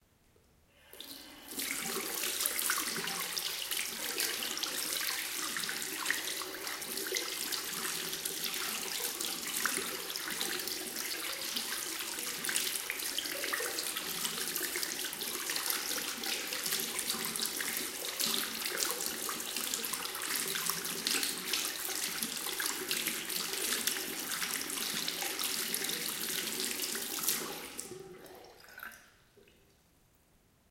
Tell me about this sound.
Washing hands.
Thank you!